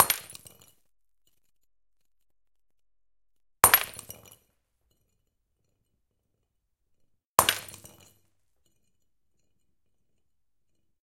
Breaking glass 6
A glass being dropped, breaking on impact.
Recorded with:
Zoom H4n on 90° XY Stereo setup
Zoom H4n op 120° XY Stereo setup
Octava MK-012 ORTF Stereo setup
The recordings are in this order.
breaking, dropping, falling, floor, glass, glasses, ortf, xy